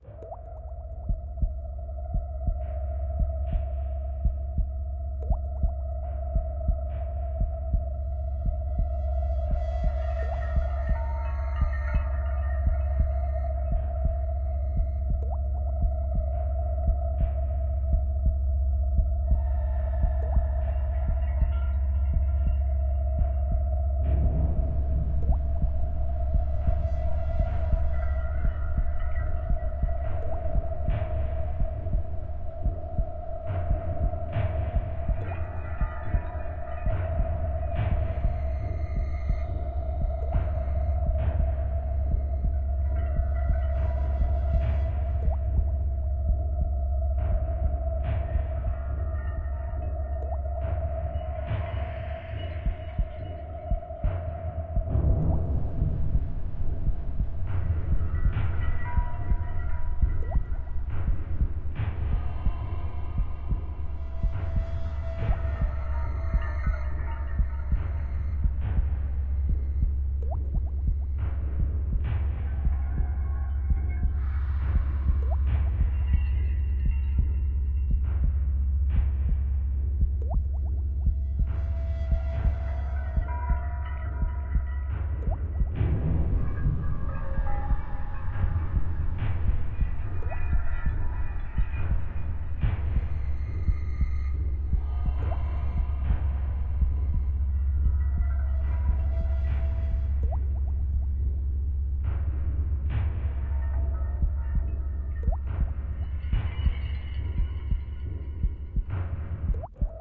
This is my Ambient loop for my college work